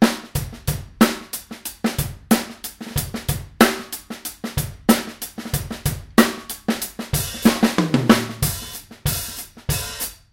A straight drum beat from the song "The what ifs" played by me.